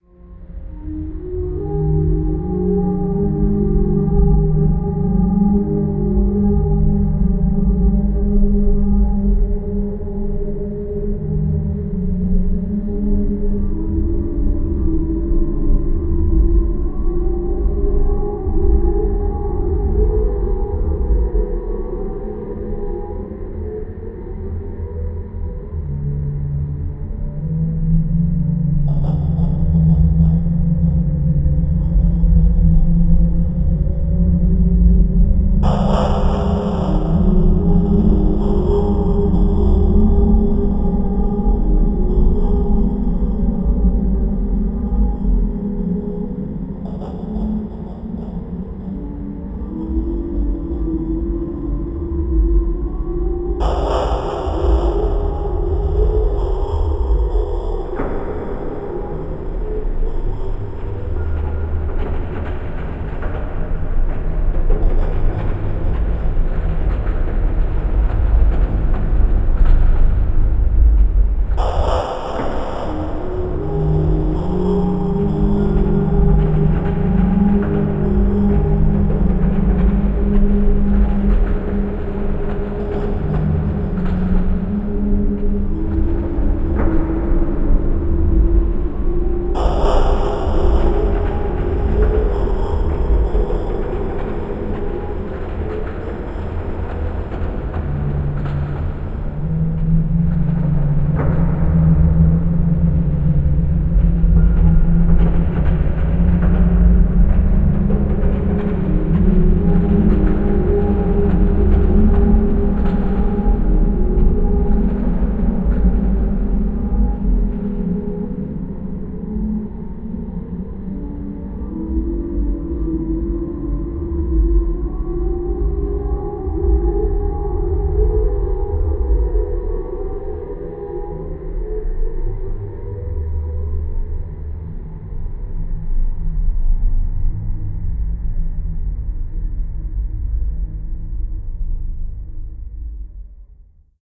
Tangible Darkness
This is the sound that I imagine would be heard when one opens a door into nothingness. Think H.P. Lovecraft.
ambient, distant, dreamlike, eerie, Halloween, haunted, horror, spooky, strange, uneasy